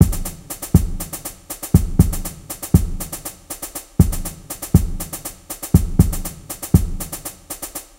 Just a drum loop :) (created with Flstudio mobile)
synth, dubstep, drums, 120bpm